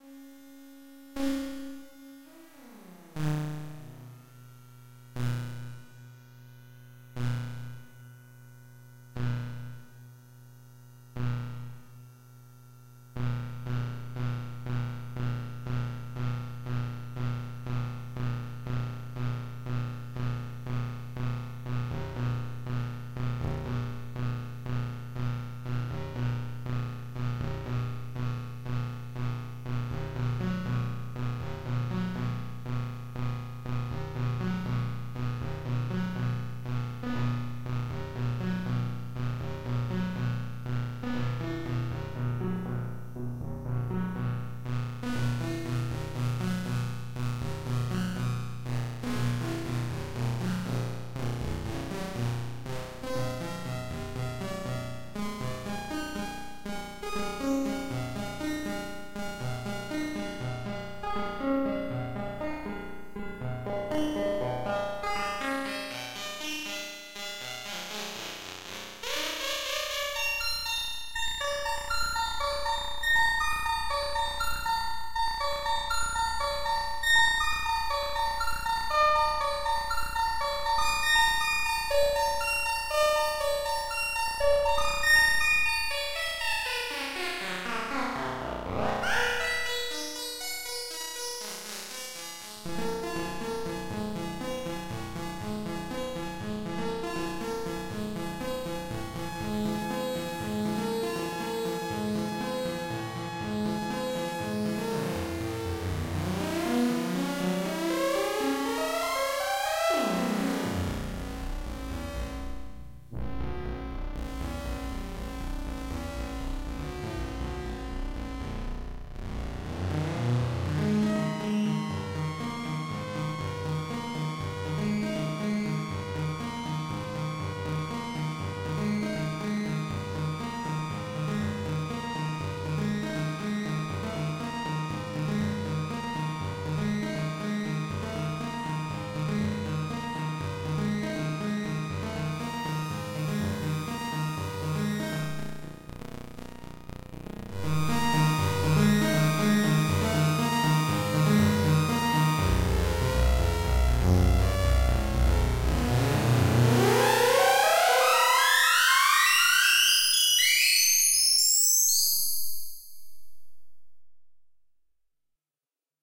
Ratatech Dark Noise tested with a VST delay. Notes played with a virtual midi sequencer implemented in Pure Data
analog-synthesizer, delay, noise